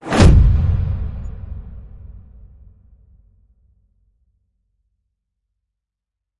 Violent Cinematic Impact
Relentless, Awe